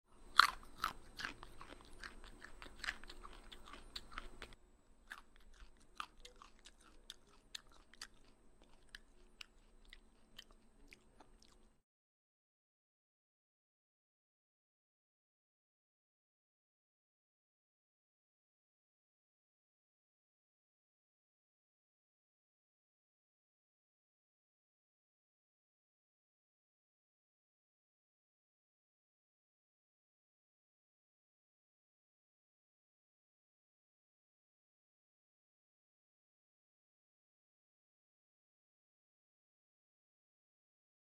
eating carrot; crunchy
Eating a carrot. Section 1 louder than second section.
biting, carrot, chew, chewing, chomp, crunch, crunching, crunchy, eat, eating, munch, munching